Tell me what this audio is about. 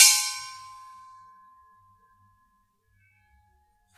These are sounds made by hitting gas bottles (Helium, Nitrous Oxide, Oxygen etc) in a Hospital in Kent, England.
bottle, gas, gong, hospital, percussion, metal